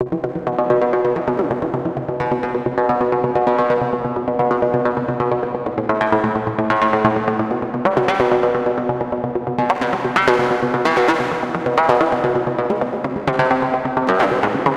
130 bpm - Banjo Arppegiated ( processed )
Created with Stream Pipe by Reaktor. Just a simple Banjo Arppegiated and processed with Eqing and Compression ....
Arppegiated, Banjo, Loop, Percussion, Melody